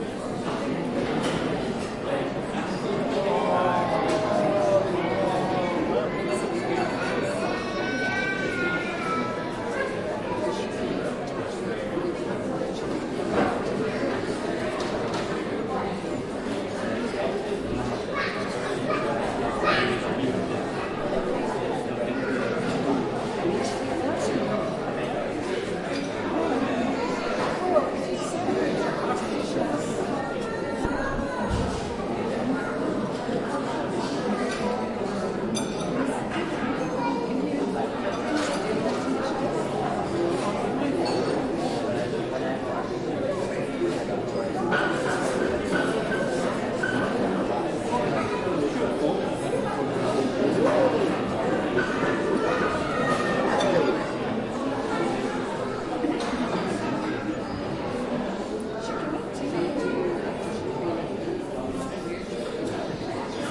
A large noisy canteen, recorded with a Zoom H4N
ambience, babble, cafe, cafeteria, children, crowd, field-recording, people, public-space, voices